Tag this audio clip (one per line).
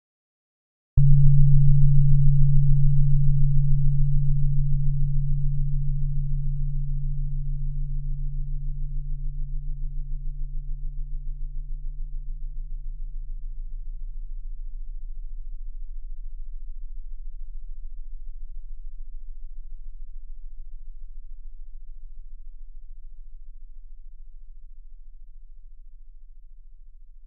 soft
pd
bell
smooth